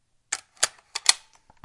De-cocking a semi automatic Shotgun slowly
Slowly de-cocking an semi automatic shotgun